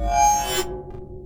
The sound of a Samurai narrowly missing your jugular vein with his katana in slow motion.
glitch, reaktor, idm, electronic, sweep